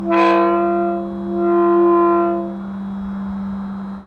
Heavy wrought-iron cemetery gate opening. Short sample of the groaning sound of the hinges as the gate is moved. Field recording which has been processed (trimmed and normalized).